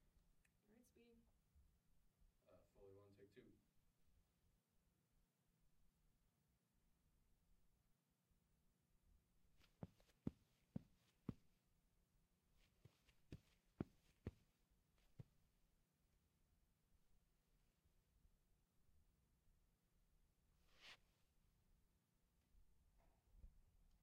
Footsteps out of home and off bike
Concrete, Footsteps